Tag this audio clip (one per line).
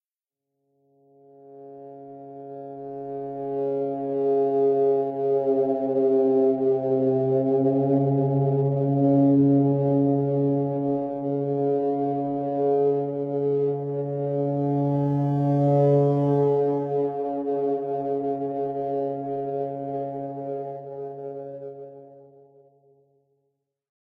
dark
ambient
rude
drone
thriller
electronic
wide
horror
deep
cold
melodic
cinematic
angry
anxious
synthetic
thrill
kino